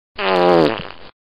wet fart
fart, wet